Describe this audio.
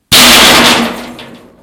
Metallic Smash
Boom, Tool, Metal, Hit, Tools, Friction, Smash, Crash, Plastic, Impact, Bang, Steel